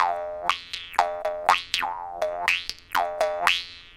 Vargan F2 Sequence 01
decided to test my mic to record high frequencies)) Note that even with a decrease in the loop on the octave (-12). Harmonic signal will sound up to 20 kHz.
Tune: F2, +25 cent
Record gear: ECM 8000, PrismSound Lyra
jaw, sequence, vargan, harp, khomus, lips, jews-harp